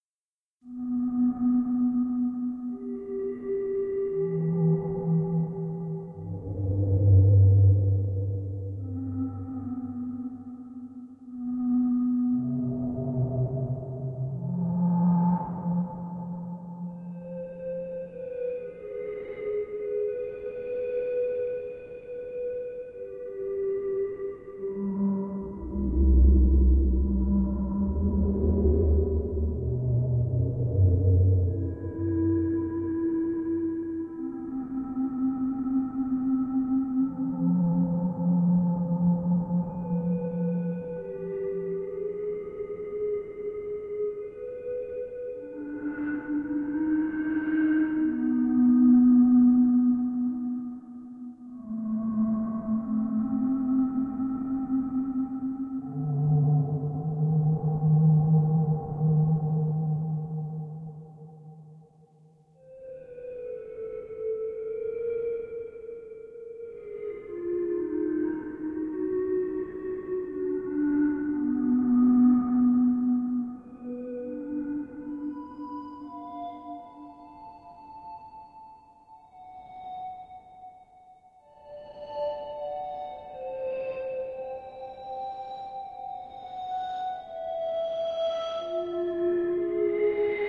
softAmbienc3 Edit 1 Export 1
A mellow and uneasy feeling background ambiance made from a simple keyboard arrangement.
background, abstract, science